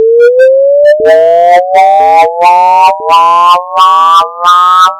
I generated a "whistle" 440Hz to 1320Hz sine.
Then I added an effect "echo" for 1 second and effect "whawha" resonant frequency of 2.5 and 30% whawha